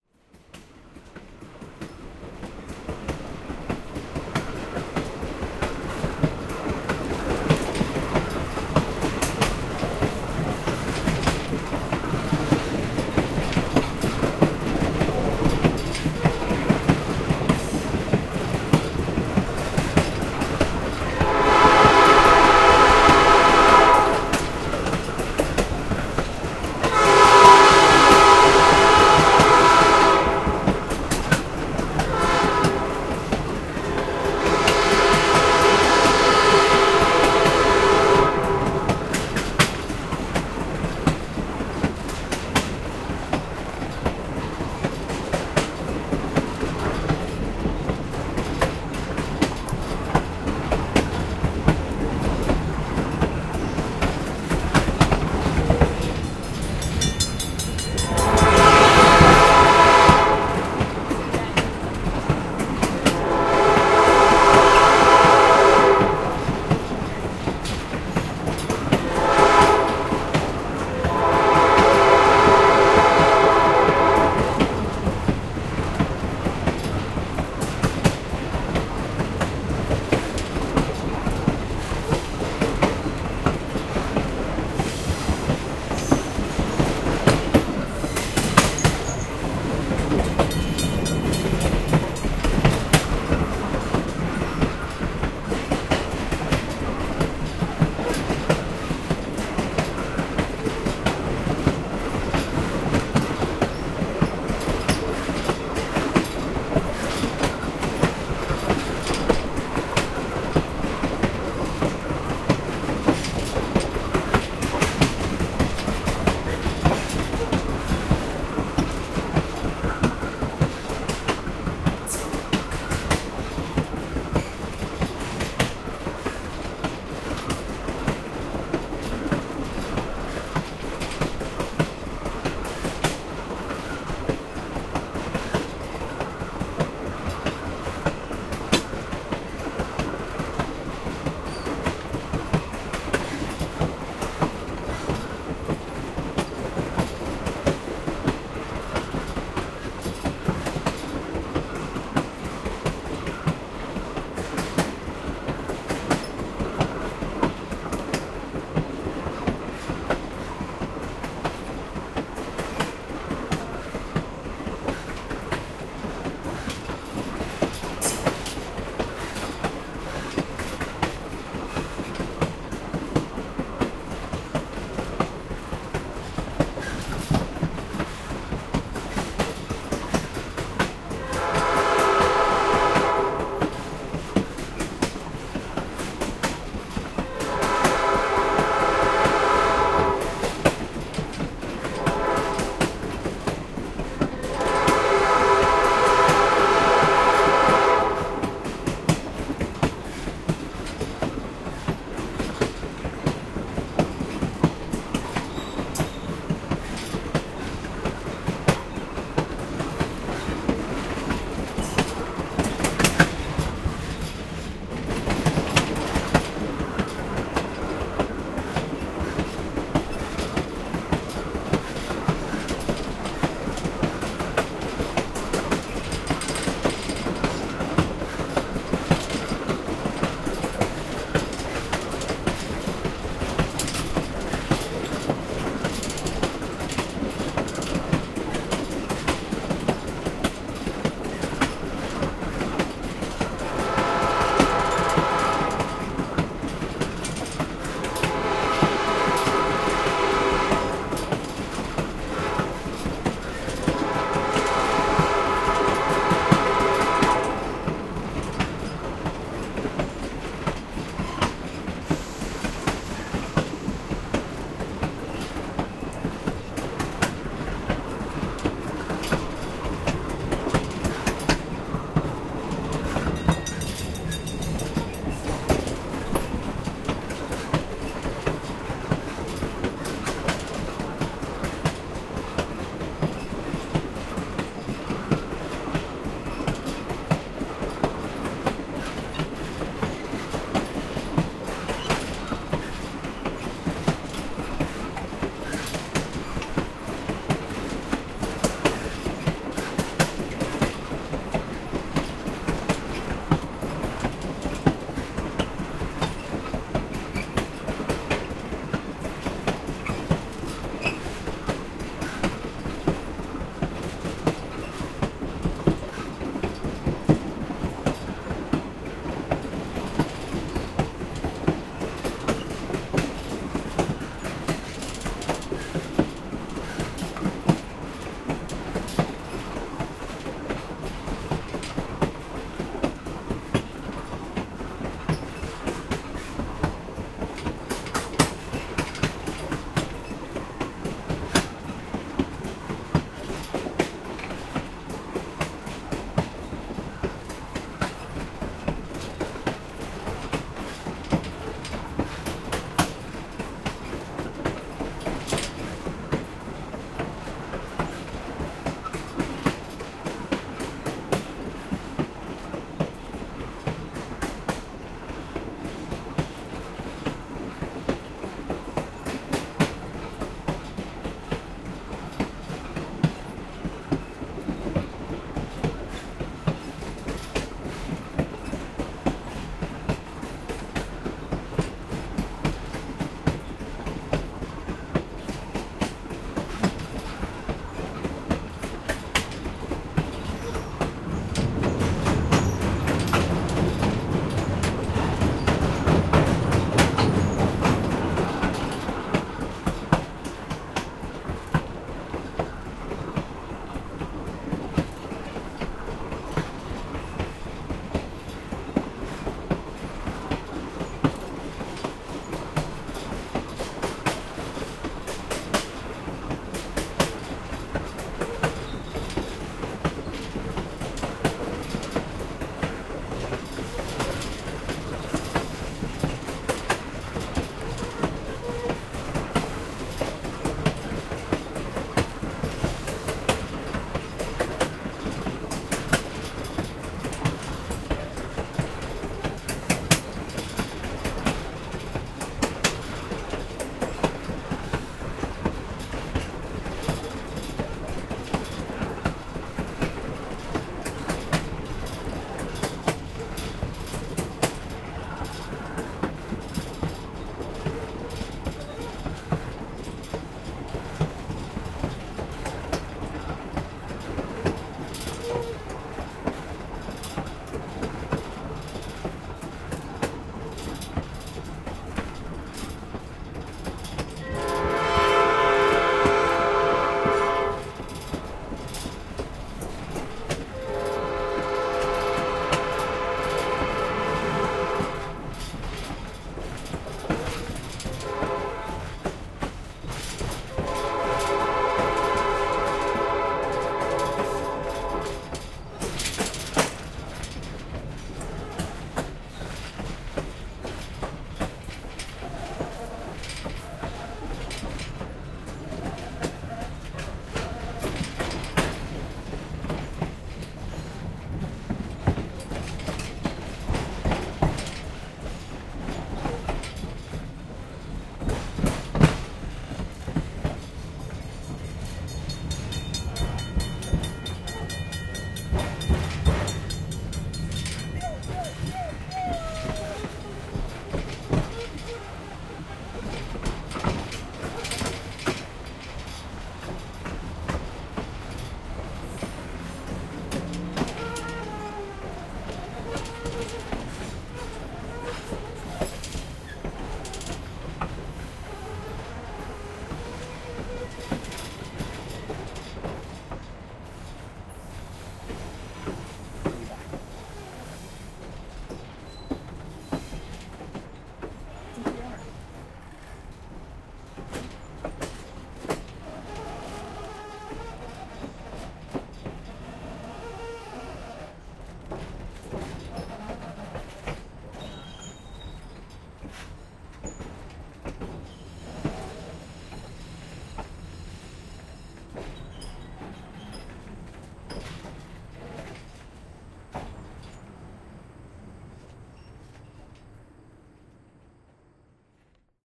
NH&IRR New Hope PA
The New Hope & Ivyland Railroad is located in New Hope, Pennsylvania, USA. Basically, it's a tourist railroad that gives visitors the experience of riding in an old fashioned train. You take a short trip out (too short in my opinion) and a short trip back to the station. Since I wanted clean sound, my wife decided to surprise me by paying for the private seats on the very back (outside) of the train. Think of old pictures of presidents waving from the rear of a moving train...that place!
So, with ZoomH4N Pro in hand, I recorded the ENTIRE trip from station to station. There's lots of good, old fashioned, clickity-clackity track in this one. A rarity these days as most tracks are now put down with out breaks for miles at a time.
Christopher
train, ambient, general-noise, tone, tailroad, surroundings, environment, sound, train-tracks, background-sound, atmos, background, atmospheric, atmo, ambience, general-sounds, experience, soundscape, field-recording, train-whistle, atmosphere, sound-scape, train-crossing, ambiance